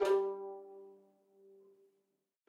One-shot from Versilian Studios Chamber Orchestra 2: Community Edition sampling project.
Instrument family: Strings
Instrument: Violin Section
Articulation: pizzicato
Note: F#3
Midi note: 55
Midi velocity (center): 95
Microphone: 2x Rode NT1-A spaced pair, Royer R-101 close
Performer: Lily Lyons, Meitar Forkosh, Brendan Klippel, Sadie Currey, Rosy Timms
fsharp3, midi-note-55, midi-velocity-95, multisample, pizzicato, single-note, strings, violin, violin-section, vsco-2